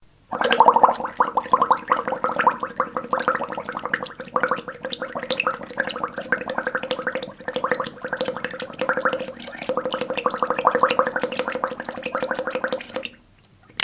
Bubbles, Light, A
Raw audio of bubbles produced by blowing into a straw in a water-filled sink. In this recording, the sink is hardly full to produce lighter sounding bubbles.
An example of how you might credit is by putting this in the description/credits:
Bubble, Water, Light, Sink, Straw, Bubbles